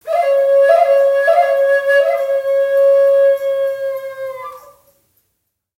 NATIVE FLUTE FIGURE 03
This sample pack contains 5 short figures played on a native north American flute, roughly in the key of A. Source was captured with two Josephson C617 mics and a small amount of effects added. Preamp was NPNG, converters Frontier Design Group and recorder Pro Tools. Final edit in Cool Edit Pro.